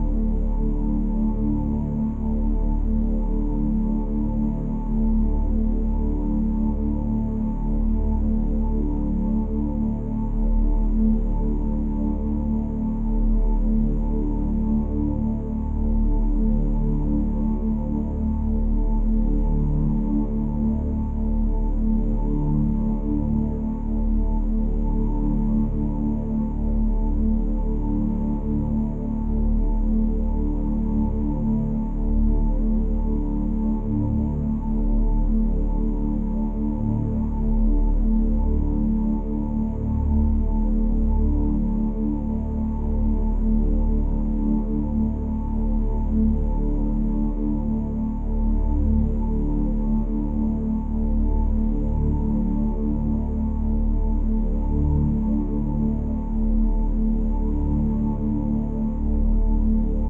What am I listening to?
A post apocalyptic sfx backgound sound creating a dark atmosphere in your project. Perfect for post apocalyptic, scifi, industrial, factory, space, station, etc.
Looping seamless.